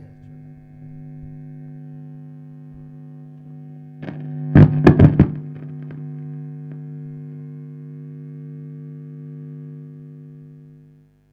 Plugging in
The improper technique of plugging a guitar in with the volumes already up and the amp switched on. This sample was generated with a Gibson SG and a VOX AC-30 amplifier. It was recorded using two microphones (a Shure SM-58 and an AKG), one positioned directly in front of the left speaker and the other in front of the right. A substantial amount of bleed was inevitable!
plugging-in, guitar